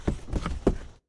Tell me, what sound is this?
Cardboard Rummaging 02
cardboard, field-recording, hit, impact